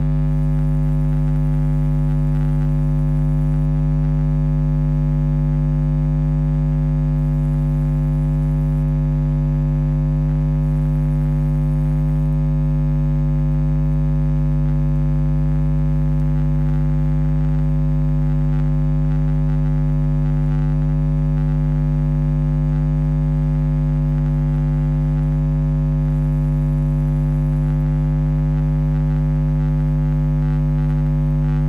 Electricity Ambience 1
sparks, sparkling, zapping, volt, ark, glitches